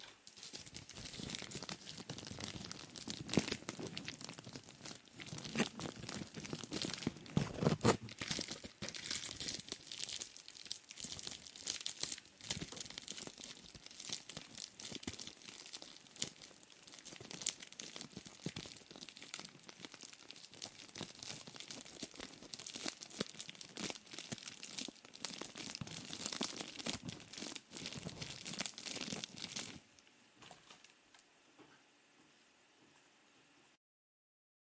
rustling bubblewrap test
Rustled bubblewrap recorded with AT2020USB+ and Reaper.
Poped noise filtered with high pass function.
Can be used for many usages like transformation sound effect (Male to female, female to male, etc), horror fiction/movies and many more.
Enjoy !!
transformation, shift, horror, shapeshifting, morph, gore, flesh, stretching, skin, zombie